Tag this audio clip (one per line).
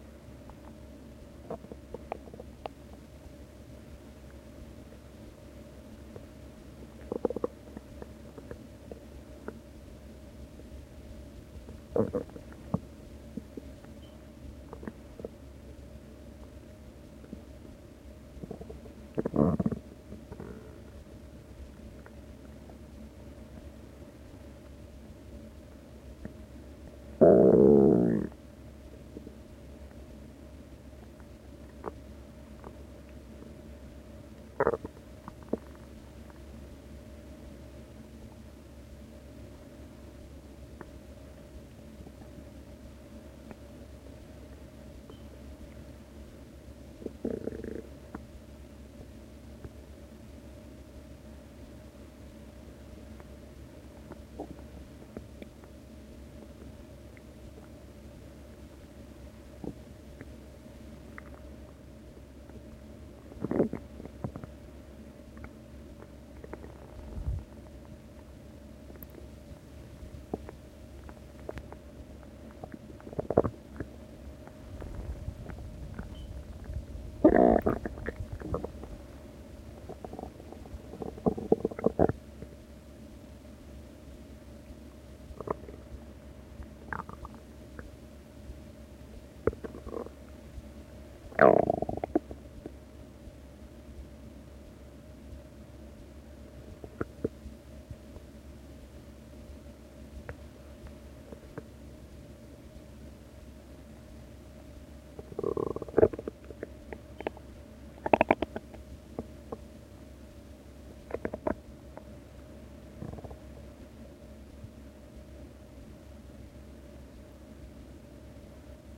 body
bubble
digestion
groan
gurgle
guts
human
intestines
liquid
stomach